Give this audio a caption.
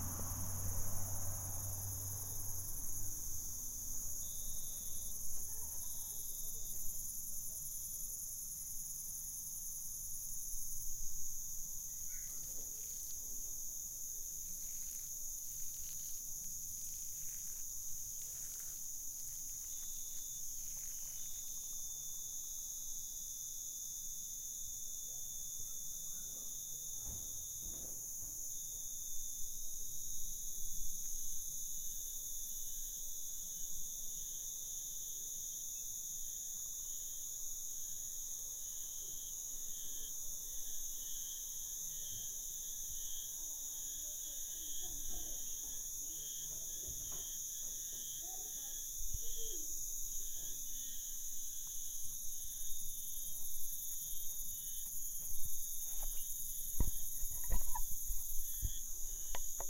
Las grabe en la noche en una finca alejada de la ciudad de UIO- Ecuador.
noche, Selva, luciernagas, de, Bosque